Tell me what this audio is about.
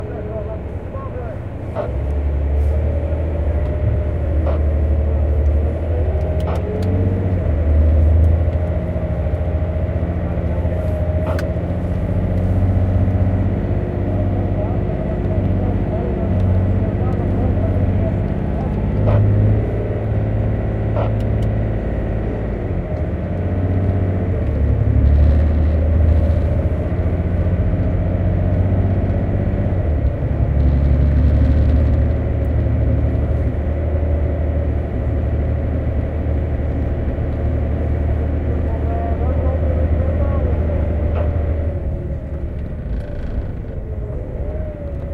truck-inside-01
Inside the cabin of a haul truck, filled with sand, driving.
digging, driving, fieldwork, gears, haul, inside, loader, lorry, sand, transport, transportation, truck, volvo